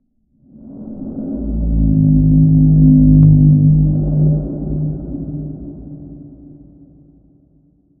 rnd moan12
Scary atmospheric organic moan sounds
Ambience, Atmosphere, Creepy, Horror, Outdoors